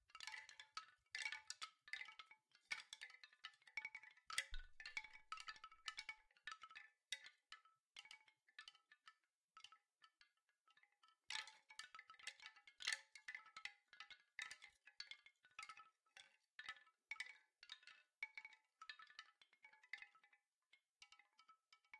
After 12 years it was time to contribute to this wonderful website. Some recordings of my mother's wind chimes.
They are wooden, metal, or plastic and i recorded them with a sm7b, focusrite preamp. unedited and unprocessed, though trimmed.
I'll try to record them all.
unprocessed, percussive, windchime, wood, knock, wind-chime, wooden